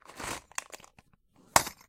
sound of plastic box of drills